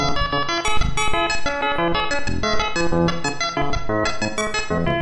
A sample of some kind (cannot recall) run through the DFX scrubby and DFX buffer override plugins
plugin, melody, dfx